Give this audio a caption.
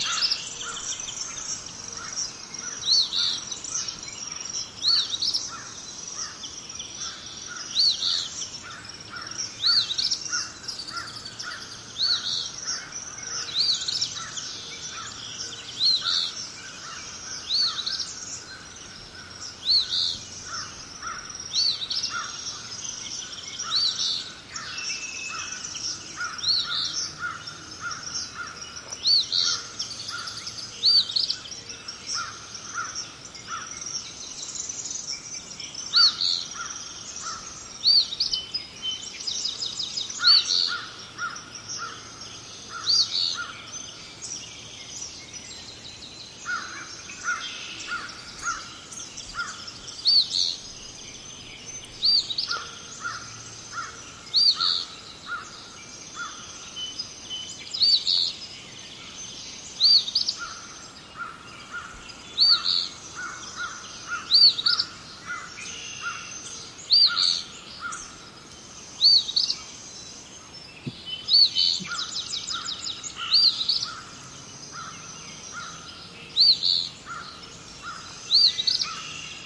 crickets and birds country morning rural farm field2 crow Ontario, Canada
birds, country, crickets, farm, field, morning, rural